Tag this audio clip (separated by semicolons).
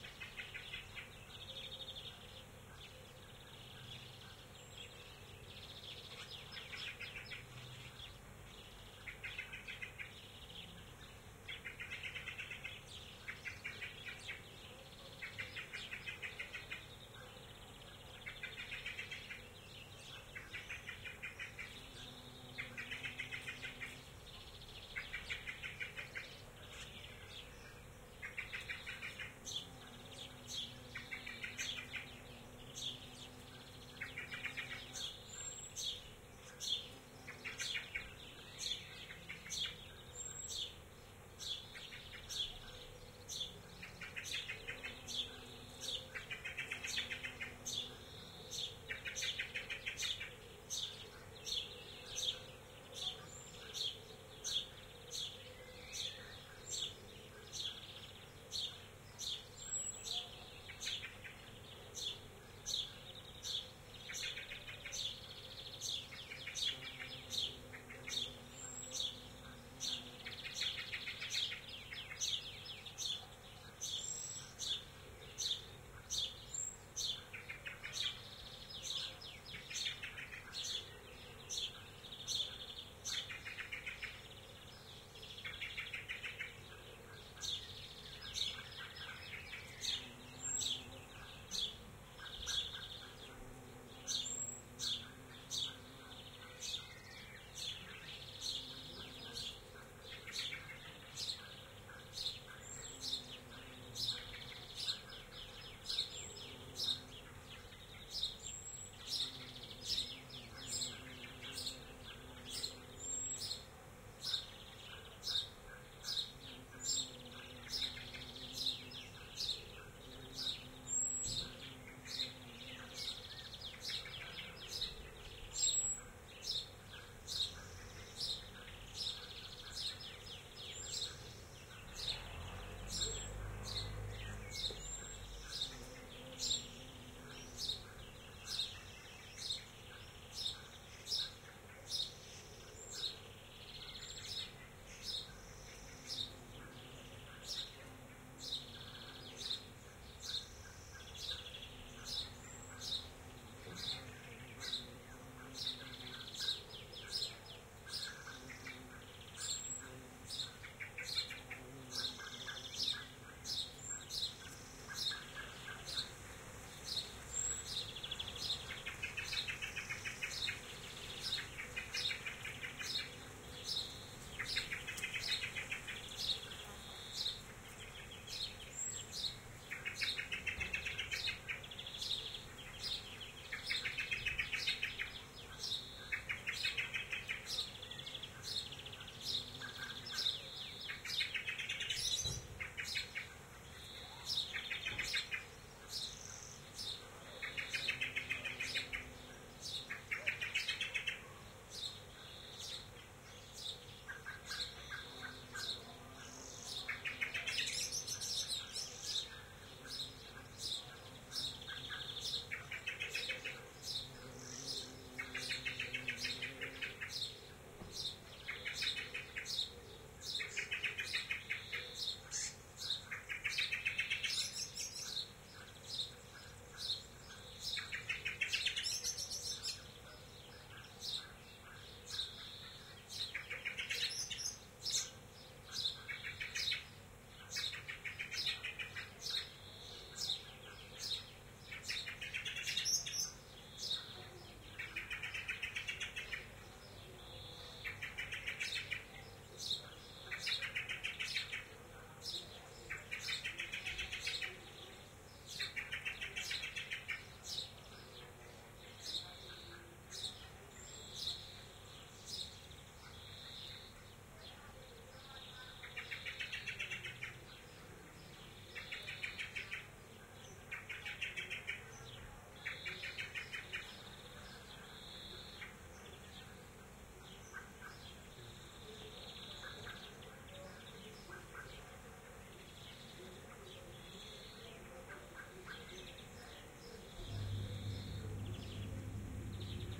Ambisonic; KU100; ST450